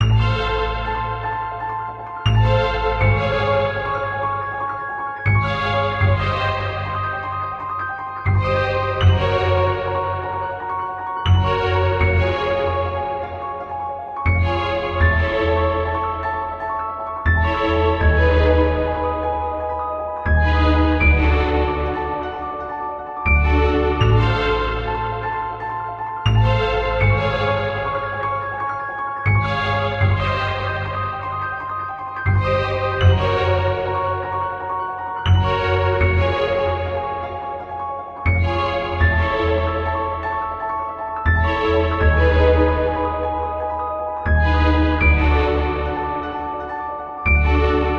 Halloween podcast 001 short loop 80 bpm
free, podcast, 80, halloween, 80bpm, loop, pumpkins, bpm, pumpkin